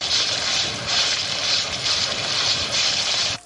PasosEn RIO
Pasos sobre agua
juegos, Pasos, Rio, Video